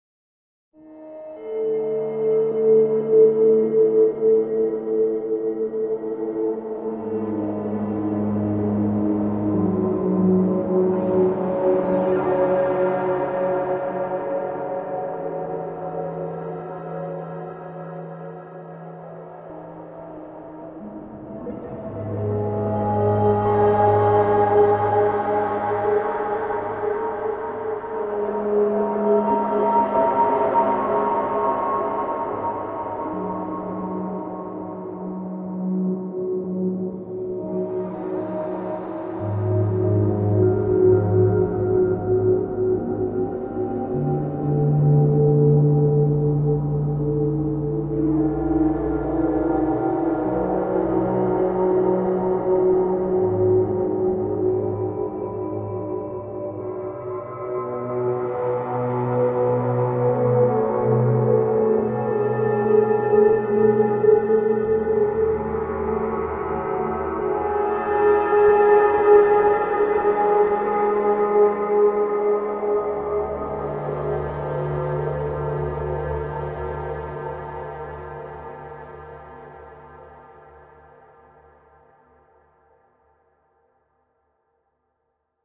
A menacing electronic soundscape with a dark science-fiction ambience - part of my Strange and Sci-fi 2 pack which aims to provide sounds for use as backgrounds to music, film, animation, or even games.
ambience, atmosphere, cinematic, dark, electro, electronic, music, noise, processed, science-fiction, sci-fi, soundscape, synth